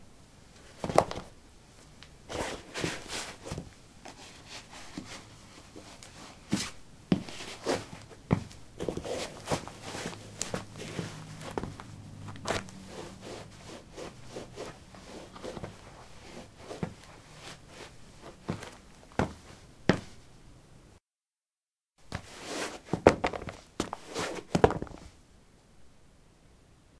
concrete 13d sportshoes onoff
Putting sport shoes on/off on concrete. Recorded with a ZOOM H2 in a basement of a house, normalized with Audacity.
footstep, footsteps, step, steps